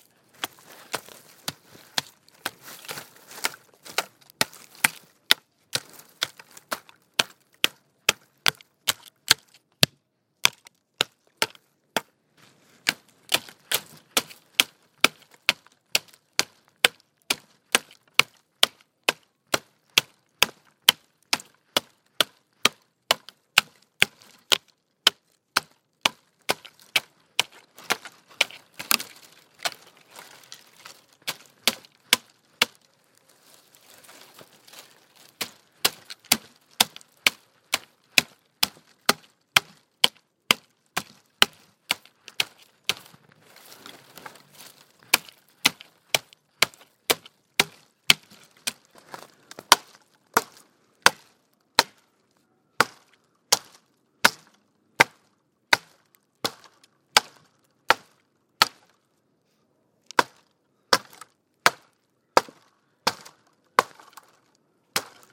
forest stick sticks wood hit carpenter woodcutter axe handmade manual work craft crafting handcraft handcrafting
hit, forest, craft, woodcutter, handcrafting, work, stick, axe, handmade, handcraft, wood, carpenter, manual, sticks, crafting